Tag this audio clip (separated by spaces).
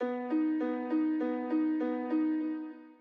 Piano Music-Based-on-Final-Fantasy Lead Sample